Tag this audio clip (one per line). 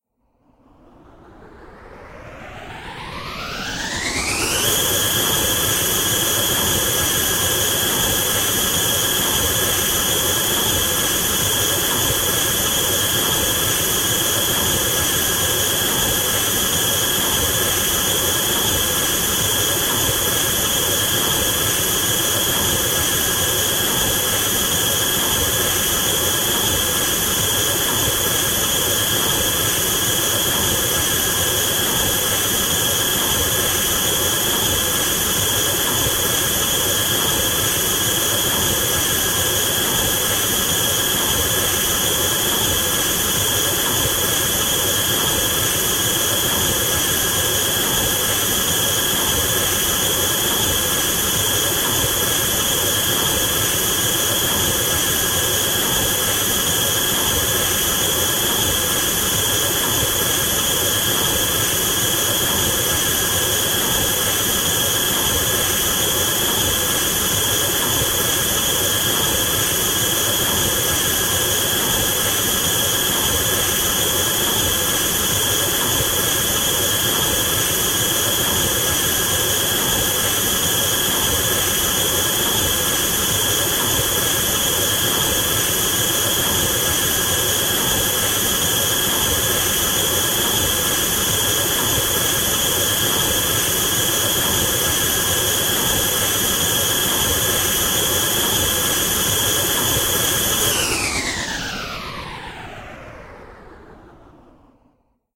diesel-engine
electric-engine
electronic
house
technical-sound
vacuum-cleaner